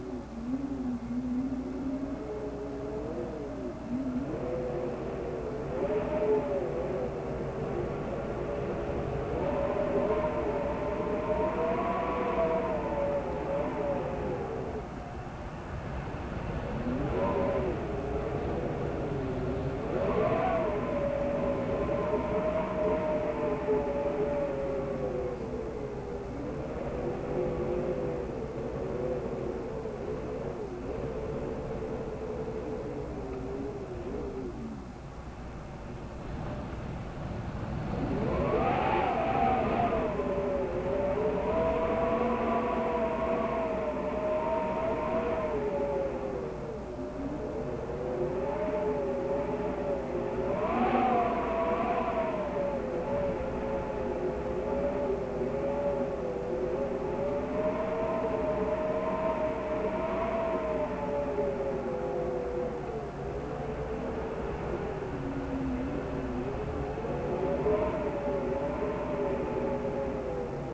Mono recording of howling wind heard from indoors.
ambiance, ambient, haunting, howling, inside, wind